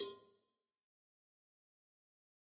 Cowbell of God Tube Lower 010
cowbell, god, home, metalic, record, trash